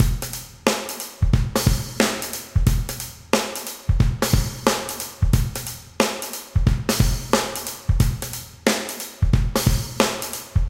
Funk Shuffle 90BPM